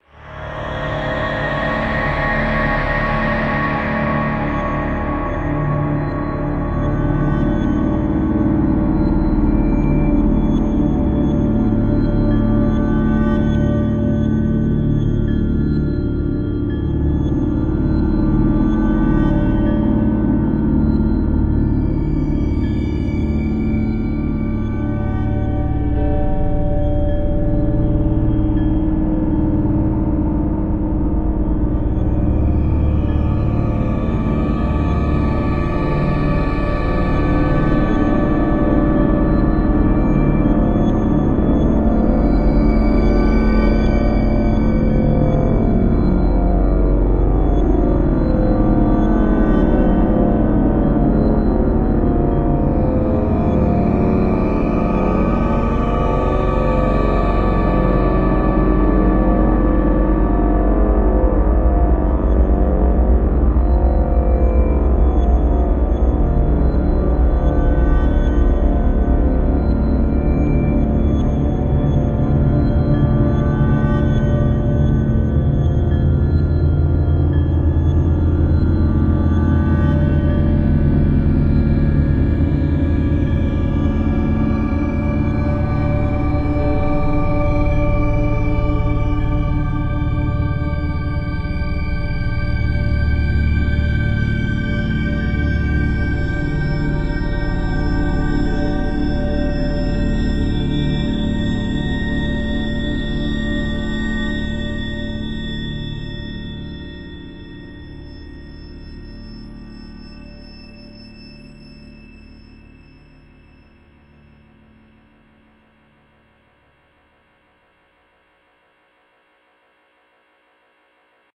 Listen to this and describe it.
terror ambience
Digitally made, though I can't remember what I exactly did... probably clicked a few buttons and pushed my keyboard around a few times 'till something happened in my program (was it even running when I did that?)
enjoy :)
alien, aliens, ambient, apocalypse, background, creepy, dark, drone, haunted, hidden, horror, mysterious, phantom, scare, scared, scary, sinister, spooky, suspense, terrifying, terror, x-com, xcom, zombie, zombies